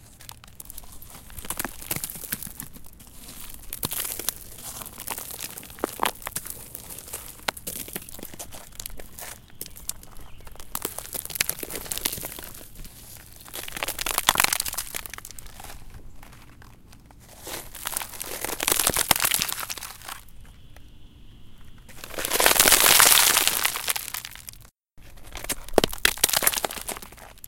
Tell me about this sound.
Rocks falling over rocks, over a steep hill, like a cliff
Small rocks tumbling down a steep hill. We got in the van and drove down a rural road. On the side of that road, we found land with its topsoil removed, stripped also of its plants, but there were lots of rocks and a small cliff where rocks could tumble down. The location where this audio was recorded in Arkansas is shown in a video of our family adventure.
cliff, dirt, land, rocks, sand